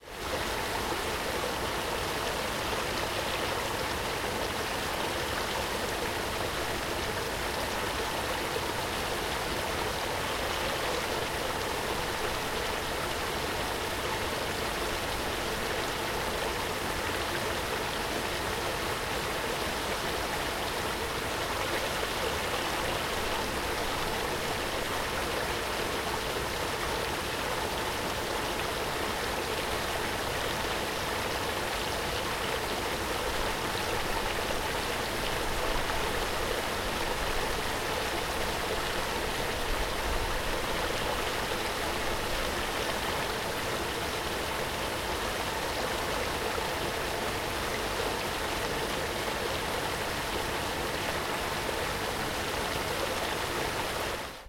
Waterfall, Small, A
Raw audio of a small waterfall by the University of Surrey lake.
An example of how you might credit is by putting this in the description/credits:
The sound was recorded using a "H1 Zoom V2 recorder" on 27th October 2016.
Stream, Small, Waterfall, Water, Fall